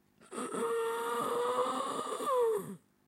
Good quality zombie's sound.
breath, moan, undead, zombie